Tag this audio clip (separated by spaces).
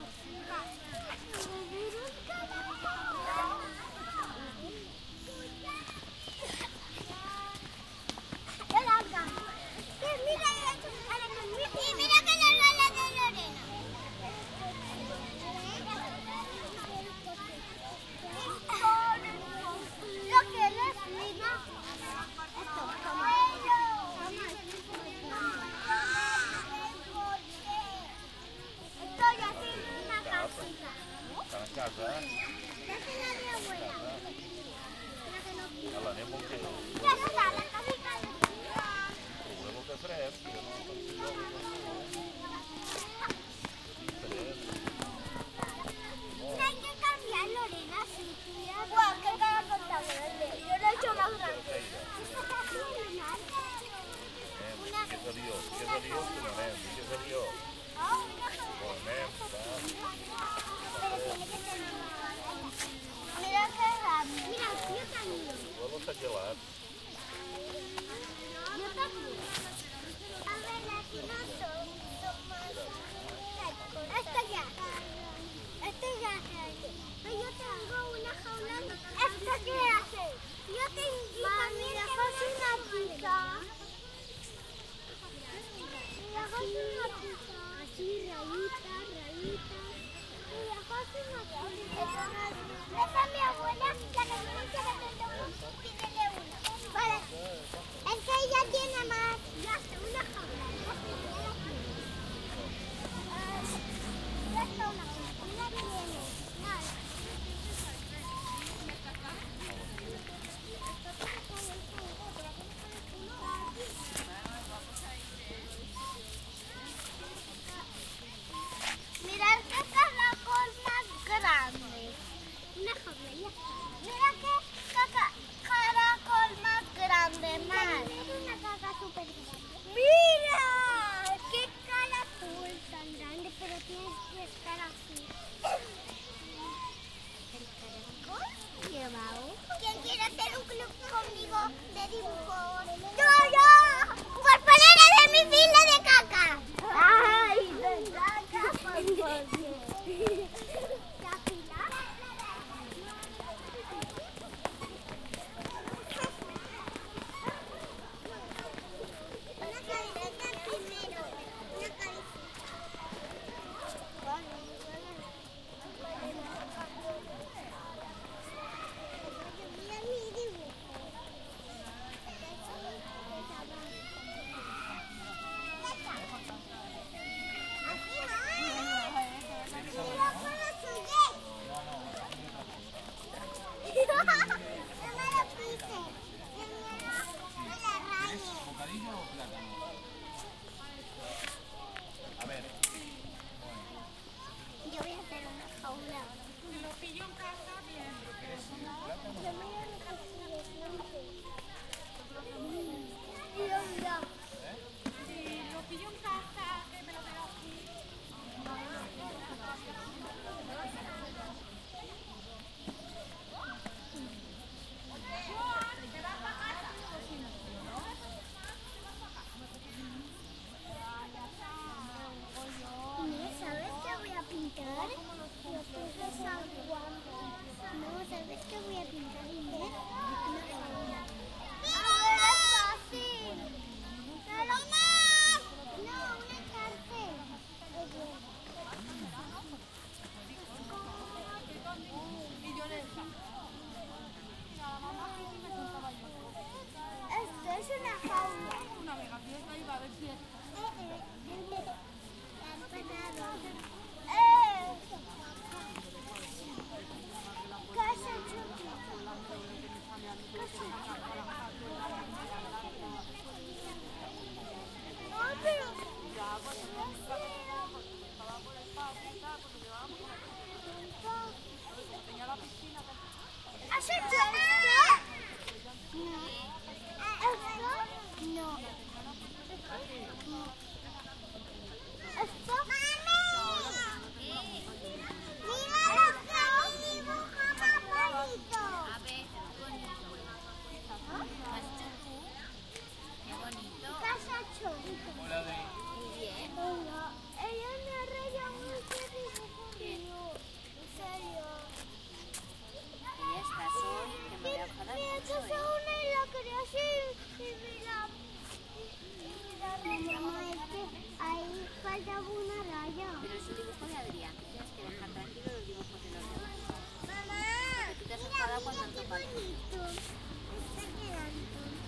children kids parents park playing